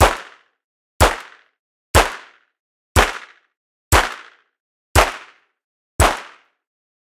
Weapon Revolver Shots Stereo
Revolver Gun Shots - 2m // Short Reverb Concrete Wall - (x7).
Gear : Zoom H5.